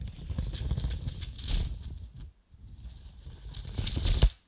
Raising and lowering blinds